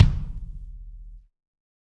Kick Of God Wet 009
kick, realistic, drum, drumset, pack, set, kit, god